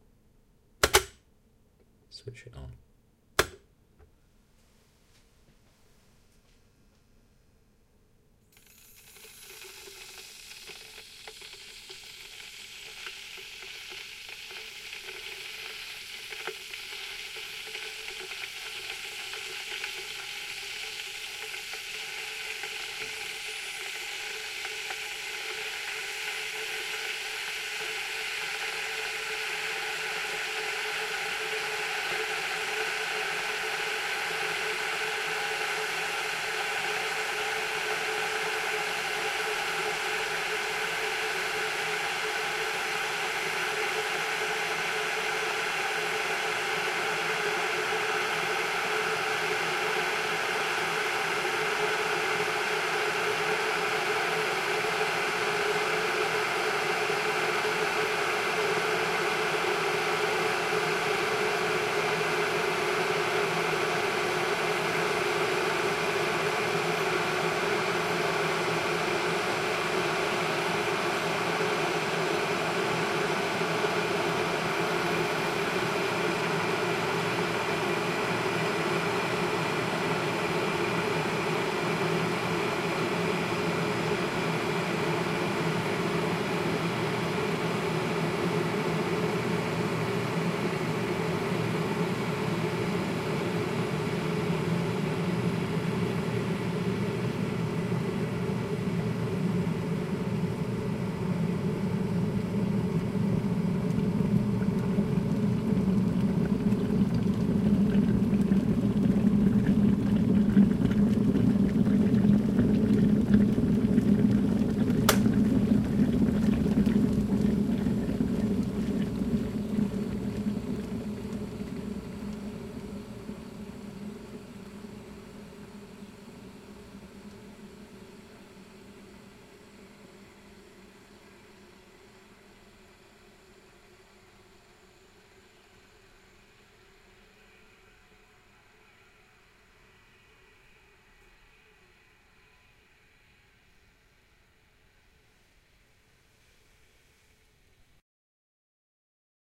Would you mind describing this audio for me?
Boiling a kettle
Kettle switched on, boils, switches off
boiling, kitchen